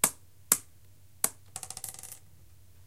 basically, this is the recording of a little stone falling on the floor, faster or slower, depending on the recording.